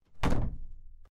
Slamming door
Just a door slamming - with anger and force